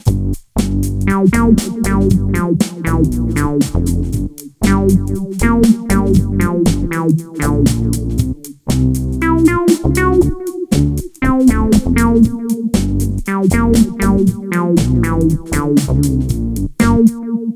Stevie run 2
a short loop with some drums, and basses, made with Cubase SE
bass, funk, loop, synth